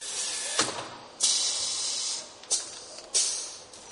Various loops from a range of office, factory and industrial machinery. Useful background SFX loops